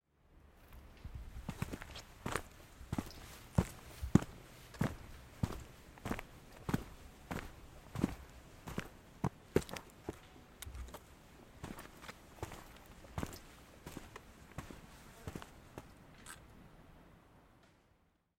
Hiking on Hard Ground 1
Sound of heavy footsteps on hard ground.
Recorded at Springbrook National Park, Queensland using the Zoom H6 Mid-side module.